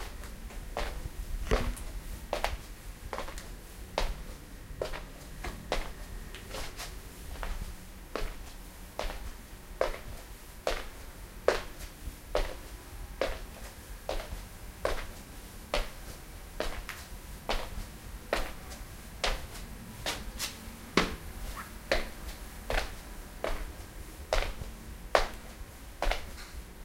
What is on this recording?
Walking On Wood Floor
walking on a hardwood floor
floor, wooden, hardwood, walking